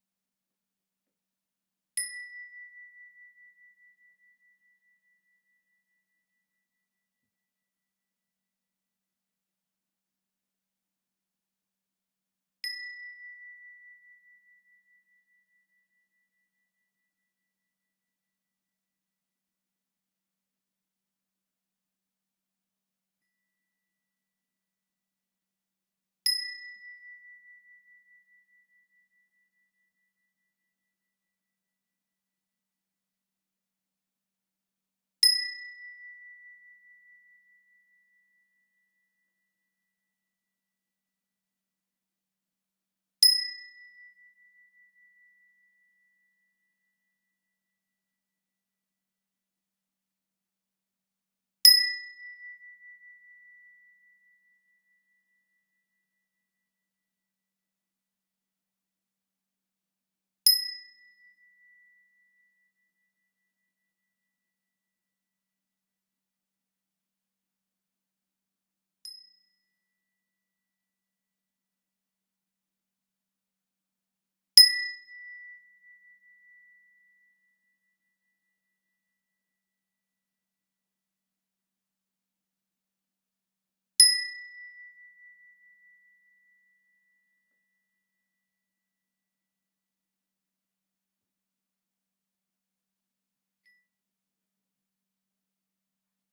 Pipe-chimes-B5-raw
Samples takes from chimes made by cutting a galvanized steel pipe into specific lengths, each hung by a nylon string. Chimes were played by striking with a large steel nail.